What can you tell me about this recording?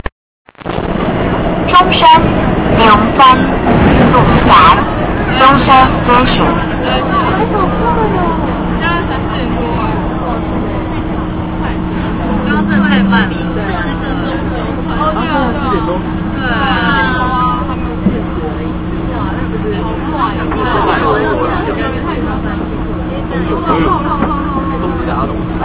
Zhongshan Station
Camera recording of Taipai Taiwan Zhongshan MRT Station announcement inside train.
taiwan, taipai, mrt, zhongshan, station